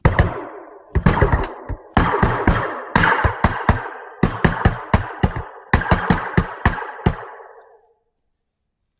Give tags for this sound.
beams future gun laser pistol scifi shooting

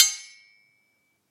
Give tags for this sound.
clang clanging clank clash clashing ding hit impact iPod knife metal metallic metal-on-metal ping ring ringing slash slashing stainless steel strike struck sword swords ting

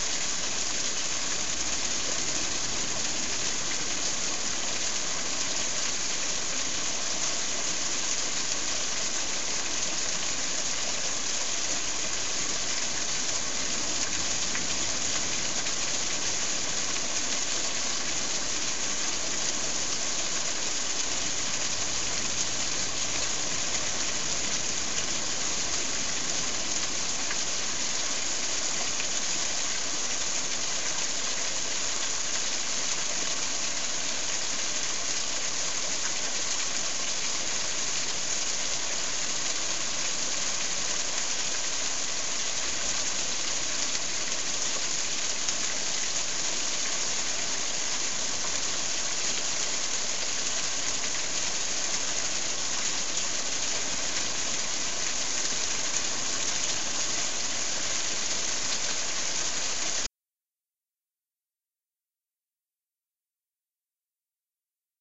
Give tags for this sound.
field-recording waterfall

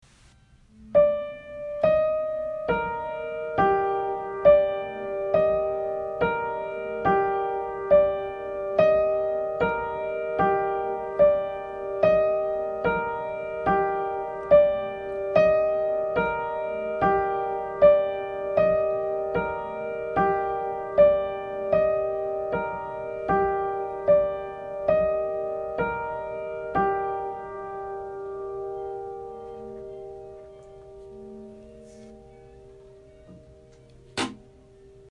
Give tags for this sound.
echo notes piano spooky

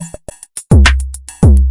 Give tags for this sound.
140-bpm drumloop electro loop

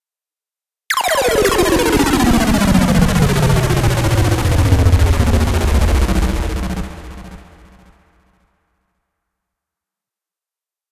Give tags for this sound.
broadcast mix podcast stereo

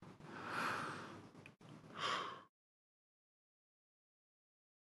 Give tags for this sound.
breath gasp breathing air

